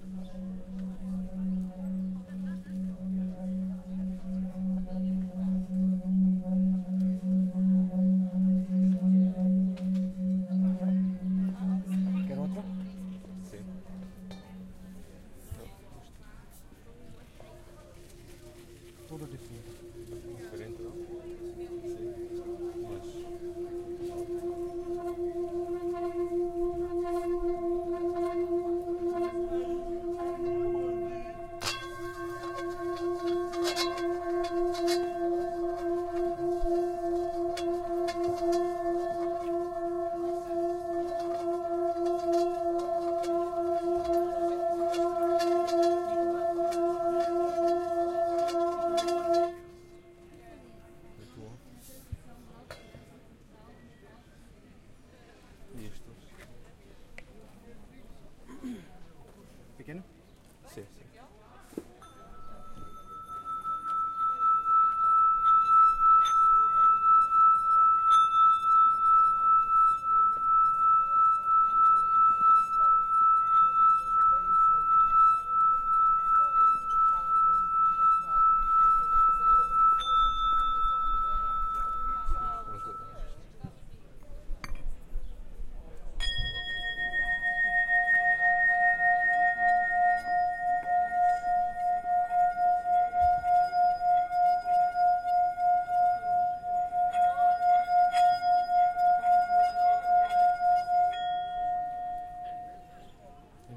STE-006-tibetanbowls-lisbon
Testing some tibetan bowls in a flea-market in Lisbon.
city, field-recording, flea-market, lisbon, portuguese, soundscape, street, tibetan-bowl, voices